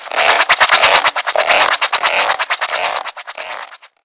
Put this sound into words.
For this sound, I recorded two sounds that I assembled, changed the tempo to rhythm and I also put an amplification effect to reproduce a sound of a mower.